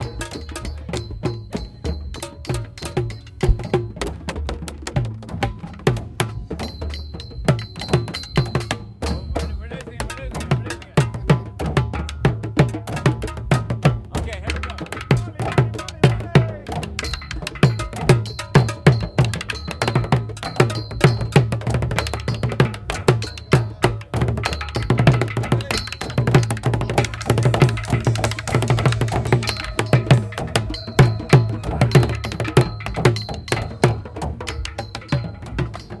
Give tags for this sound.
Festival Group Sark Trash

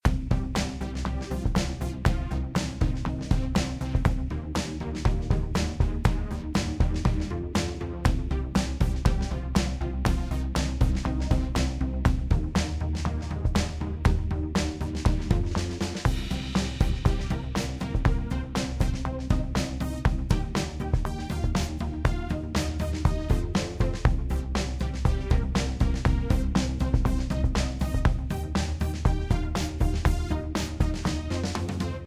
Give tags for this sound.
electro funky upbeat techno loop groovy dance

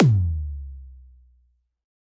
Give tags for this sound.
drum tom percussion

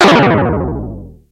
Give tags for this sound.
sfx fx game effect sound synthesizer

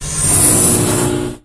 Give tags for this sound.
part industry machine moving mechanics

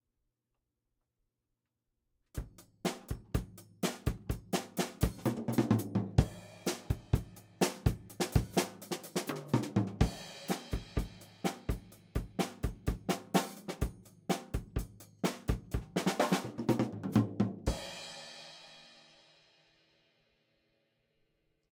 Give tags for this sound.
drum drumming music

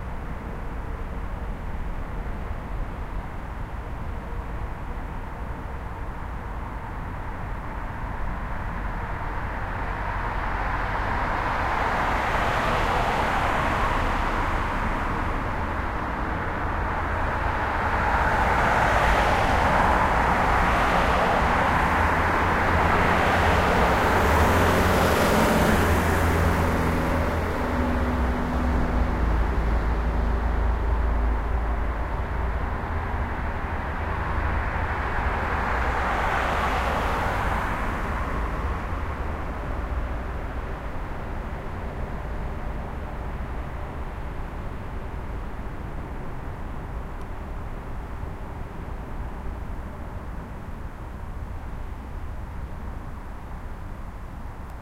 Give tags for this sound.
cars field-recording korea seoul traffic

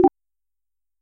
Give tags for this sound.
Beep Effects Game GUI Interface Menu Sound

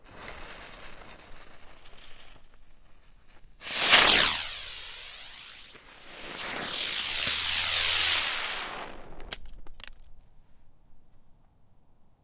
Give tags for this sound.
bee field-recording fire firecracker fuse impact sparks spinning stereo

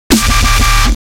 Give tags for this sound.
samples sample VirtualDJ

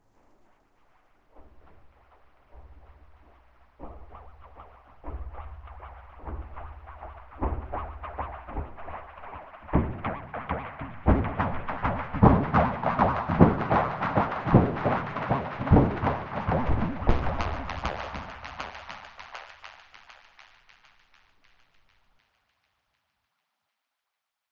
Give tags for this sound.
effect fx sfx sound

